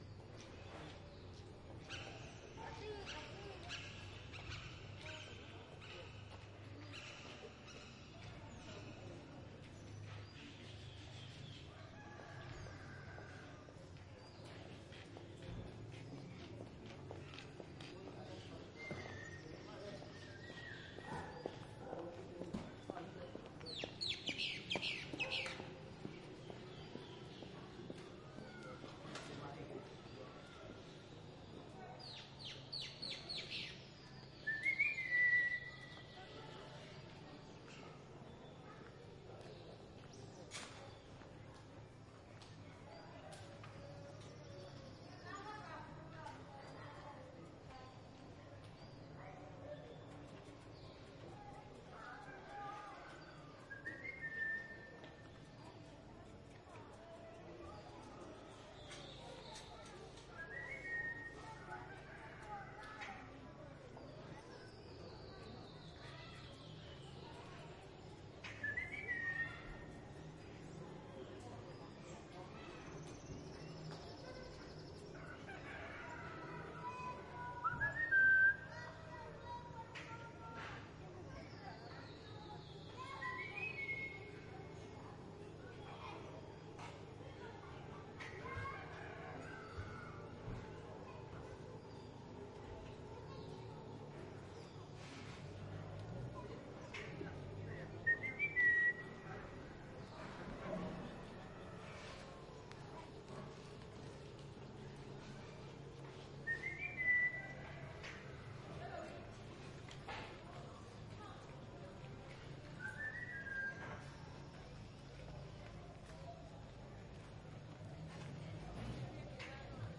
Village activity whistling
Activity in the center of a small village in the sierra mazateca(Mexico). Voices, daily activity, horns at distance.
birds, dogs, field-recording, jungle, mexico, steps, street, Village, voices, wistling